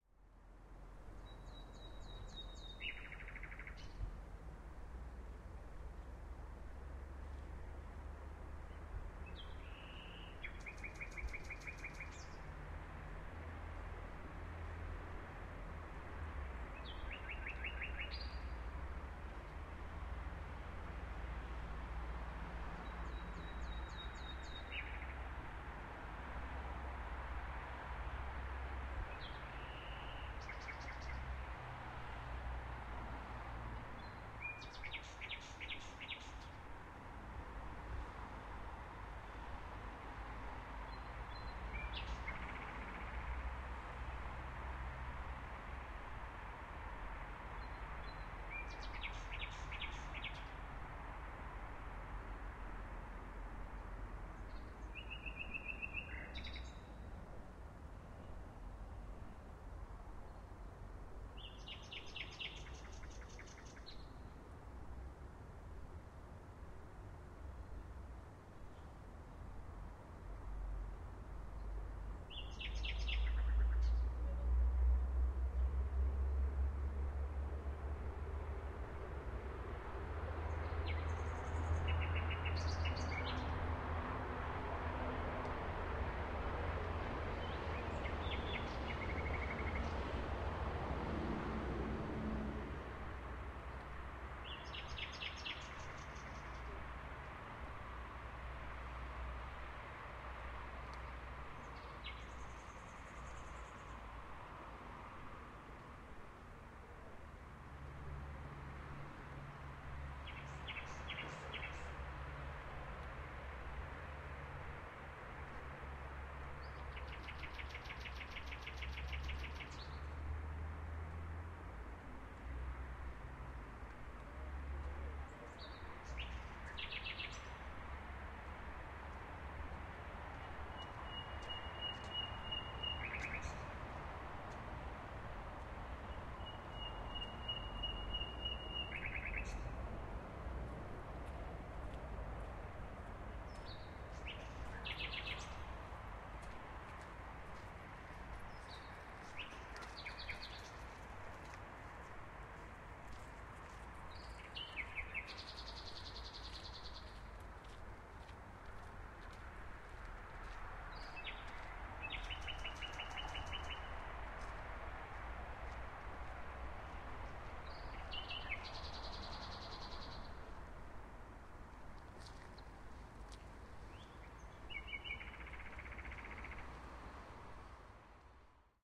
010513 bird on krzyzowa st
01.05.2013: sound of the bird singing near of Krzyzowa street in Wilda Poznan (Poland). recorder: zoom h4n (internatl mics), no processing, edition:fade in/out
may, poznan, night, bird, street, field-recording, poland